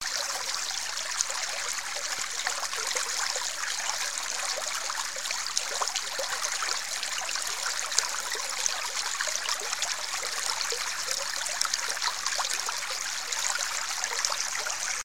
Creek 05 (loop)
Sound of a creek
you can loop it